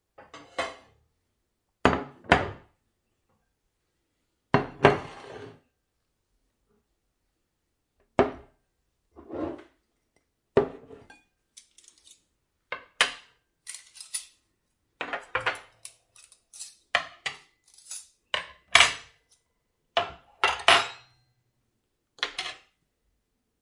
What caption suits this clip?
Setting Table
Places set for two.
Recorded with Zoom H4N pro internal stereo microphones.
table, dinner, setting